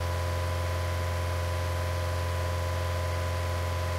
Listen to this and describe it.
Car Engine Loop
Motor Engine of an Opel Astra 1.6_16V. Recorded with a Zoom H2.
Also available under terms of GPLv2, v3 or later.
Photo:
Opel Astra 1.6_16V Engine
automobile, gas, jet, loop, run, stutter